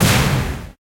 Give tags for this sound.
pickup
video
pick-up
platformer
jump-and-run
bonus
collectible
energy
speed
up
power
game
play
jump
boost
booster

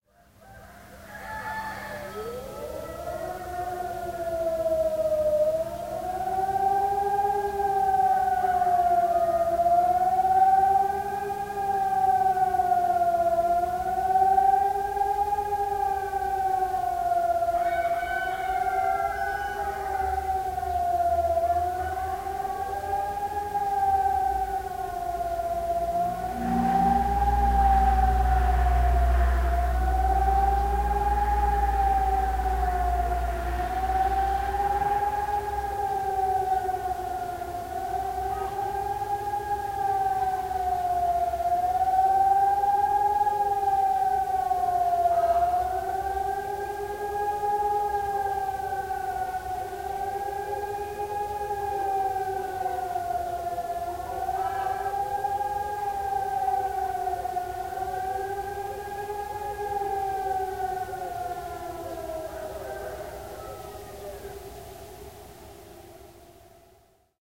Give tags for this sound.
collared-dove
Cyprus
memory
morning
sirens

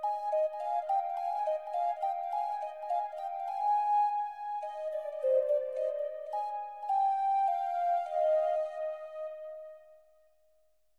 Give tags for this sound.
fi
sci

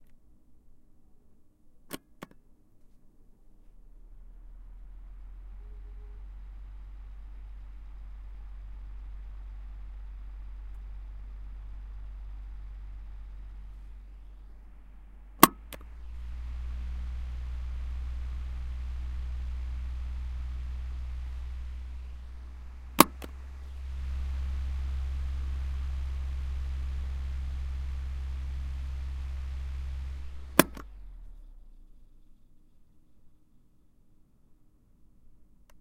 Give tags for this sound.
ambient
electric-fan

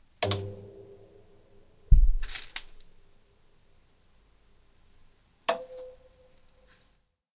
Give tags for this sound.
computer
turn
switch
crt
screen
ray
tube
startup

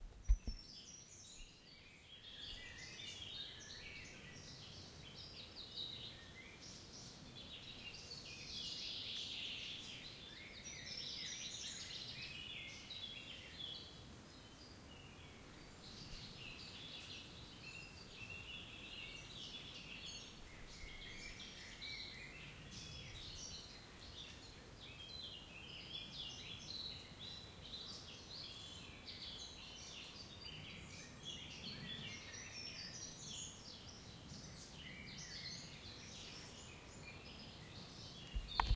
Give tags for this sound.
forest
ambient
nature
atmosphere
sablonceaux
birds
field-rec
spring
field-recording
birdsong
ambience
ambiance
bird
france
bird-sounds
birdsounds

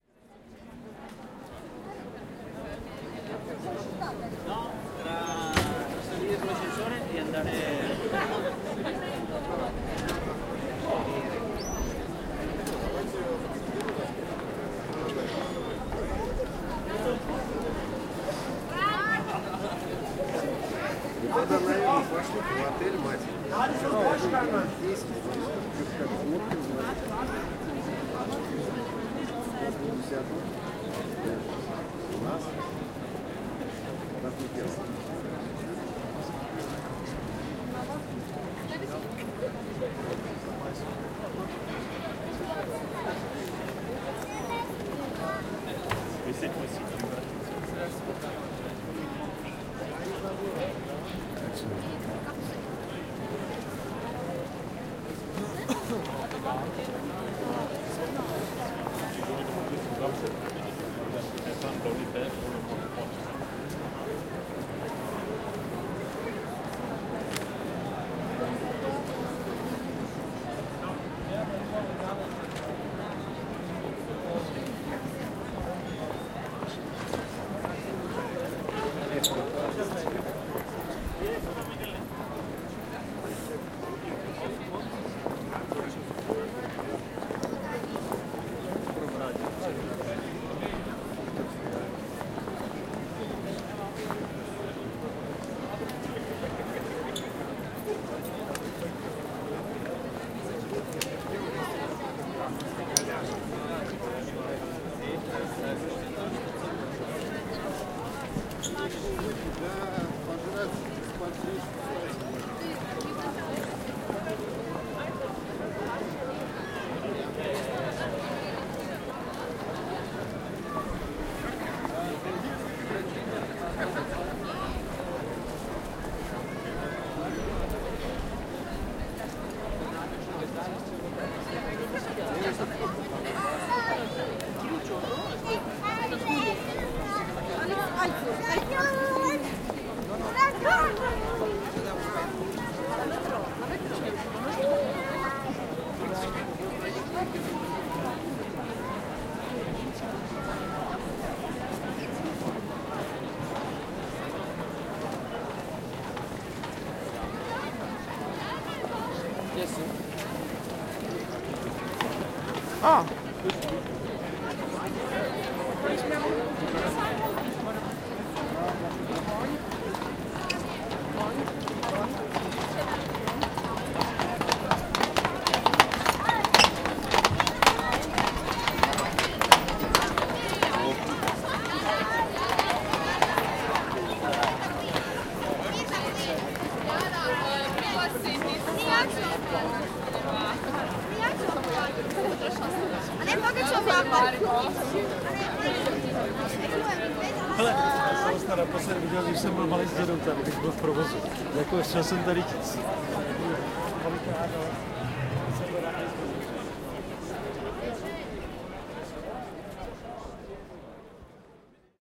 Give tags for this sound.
voice
ambiance
walking
prag
staro
noise
mesto
conversation
people
ambience
prague
christmas
atmosphere
praha
december
field-recording
markt
center
ambient
old
street
tourists
town
city

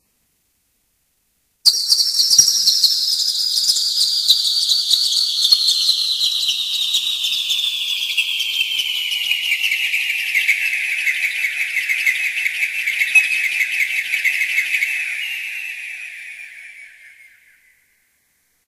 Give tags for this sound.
birds
cascade
synsthesized

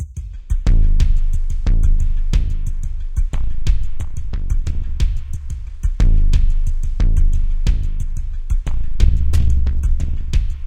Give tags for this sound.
noise
drums
pattern
loop
ping
percussion-loop
drum
drum-loop
beat
bouncy
90
percussion
percs
industrial
rhythm
bounce
hr16a
pong
bpm
alesis
drum-machine
ping-pong
90bpm

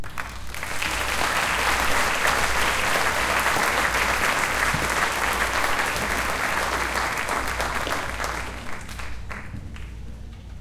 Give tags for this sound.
Crowd
live
hall
II
concert
tascam
MK
Applause
recording
field
Dr-07
auditorium

